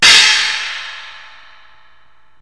crash 2 hit 1
This was a hard hit on my trash can of a 14" cymbal.